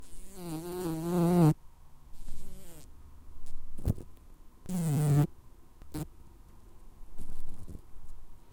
Fly. Real insect chatter wings near mic.
Date: 2015-08-26.
Recorder: Tascam DR-40.
fly, insects